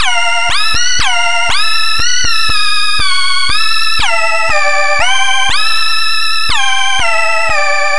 Only very sharp and abstract screeching created by testing presets of some synthesizers.